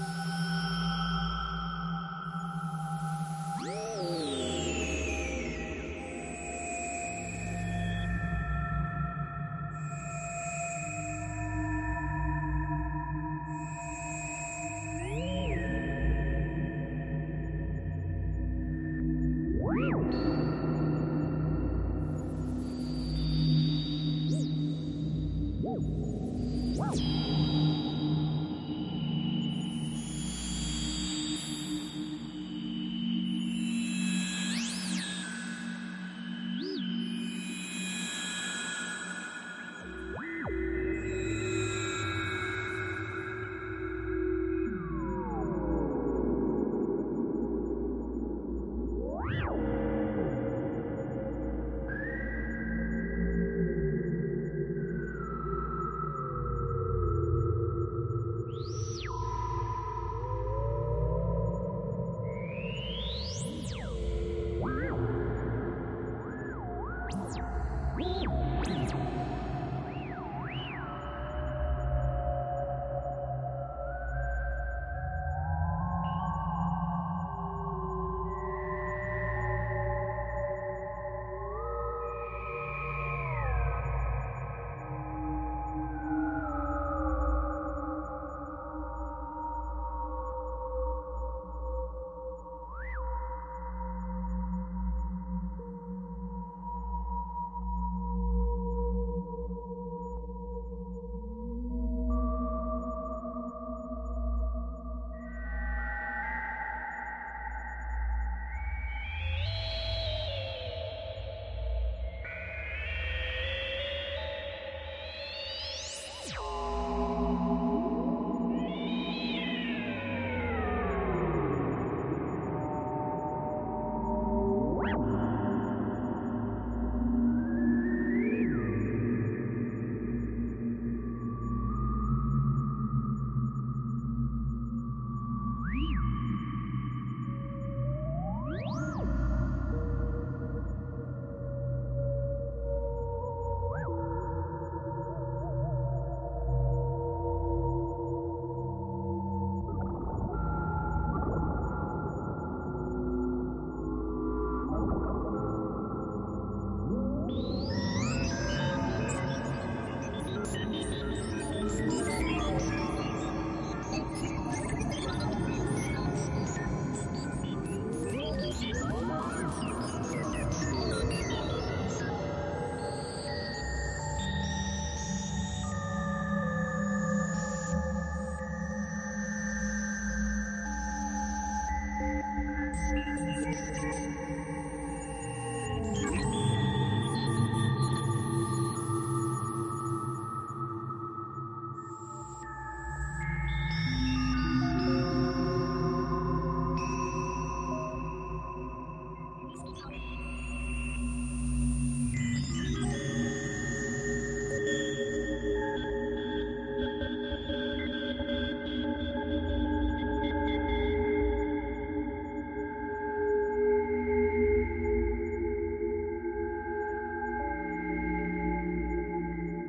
Mission Control
From a 12 voice analog synth
retro, future, space, control, noise, sci-fi, digital, effect, sound, commnication, soundtrack, computing, soundesign, synthesizer, soundeffect, panel, oldschool, scoring, electro, bleep, synth, analouge, fx, electronic, abstract, analog